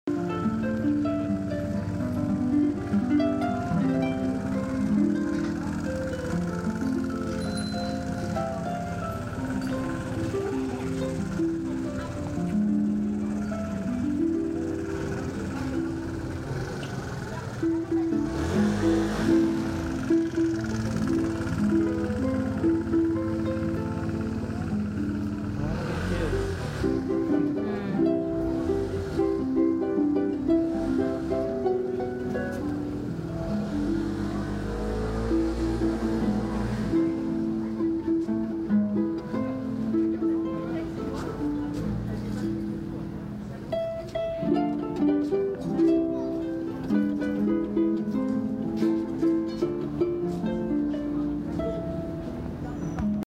Paris Christmas Street Ambience (harpist, people, cars)
A little snippet recorded of a street musician playing the harp near Christmastime in Paris.